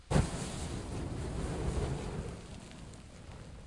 This is a light glug and splash of pouring gas on a fire.